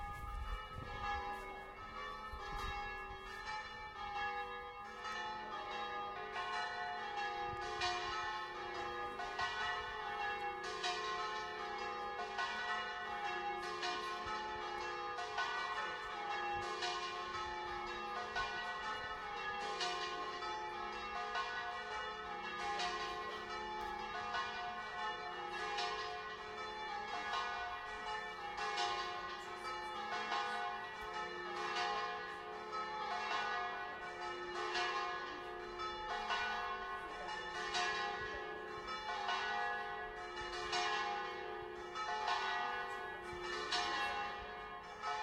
H1 Zoom. Bells and crowd in Venice - low rumble may be the boat engines in the distance - might need cleaning up.